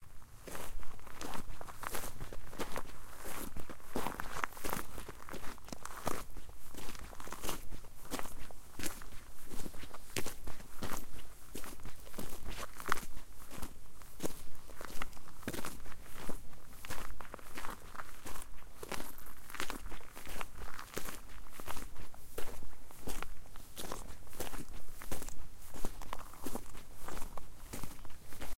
Walking in the forest 01
Walking in the wood